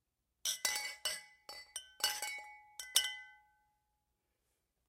Wine glasses knocked together
SonyMD (MZ-N707)